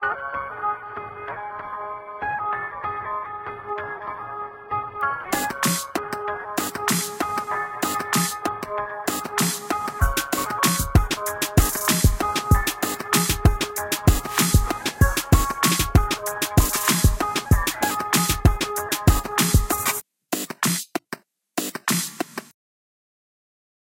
cool music made by me 5
this is some cool awesome music made in garageband :)
awesome, cool, free, music